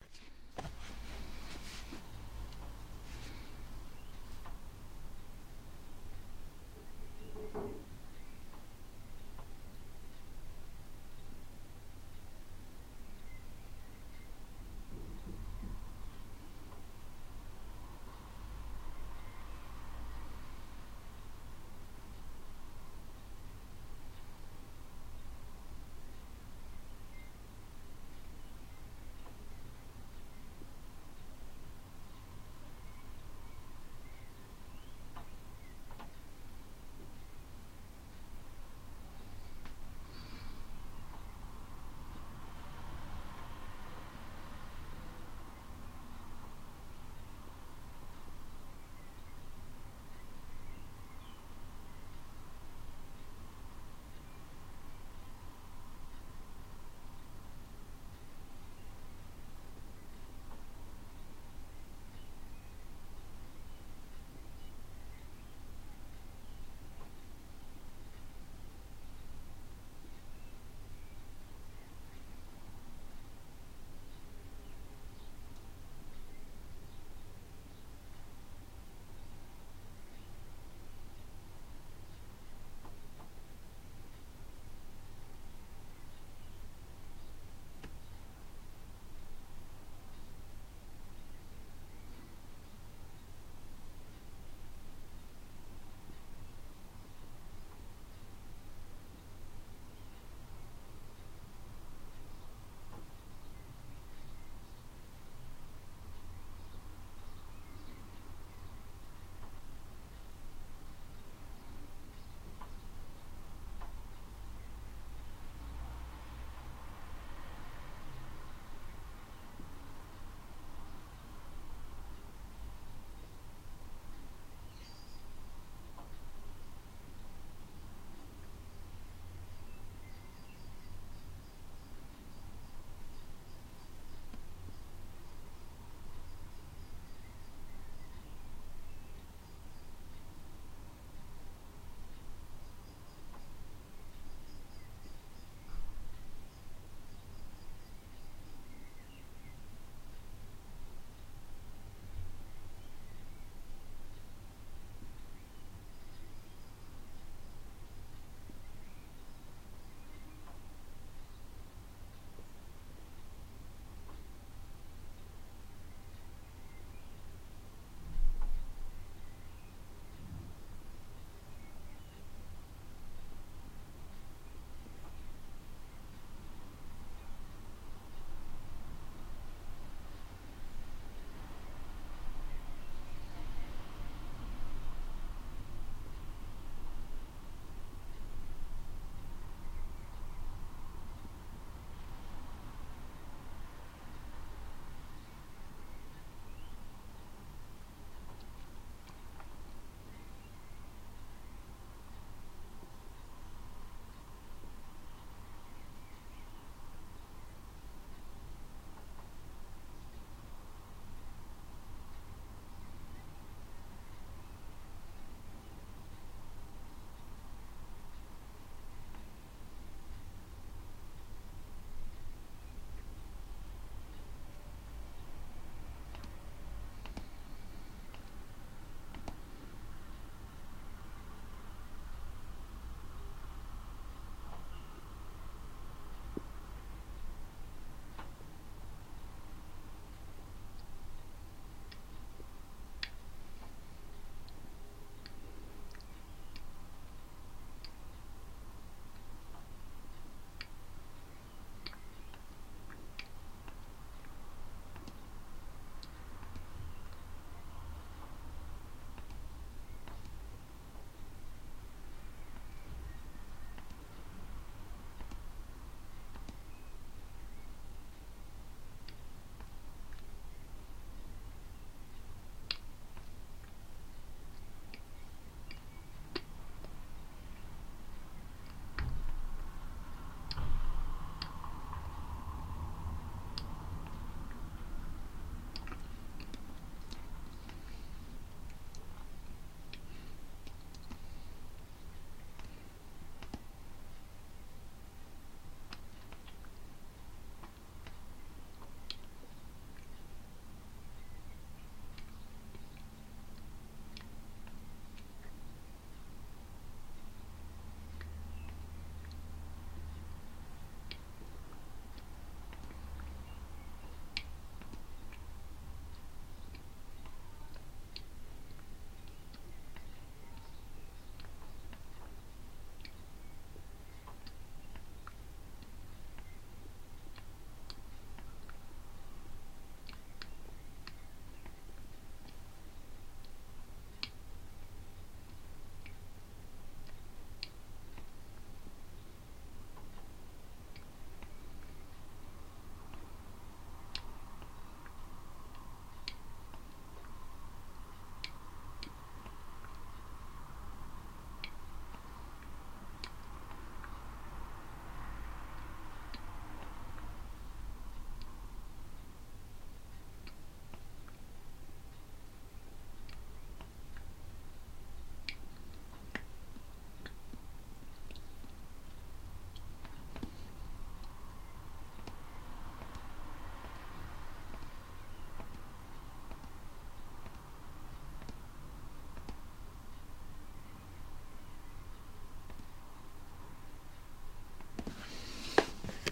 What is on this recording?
Environnement Ambiance 000
000, Ambiance